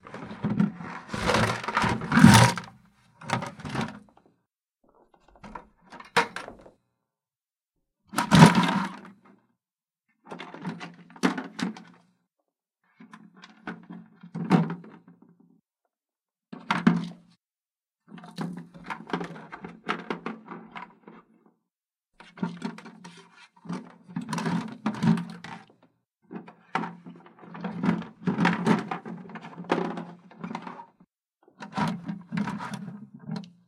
Rummaging through a 5-gallon plastic bucket filled with different items. Recorded in treated room with Shure SM78.